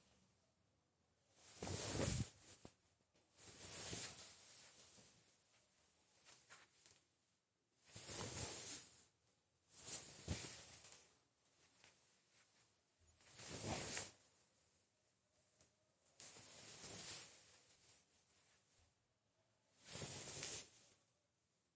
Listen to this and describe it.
Crouching and getting up-dress
Crouching and then standing up while wearing a long dress several times
dress, clothing, movement, fabric, material, squat, cloth, textile, clothes, robe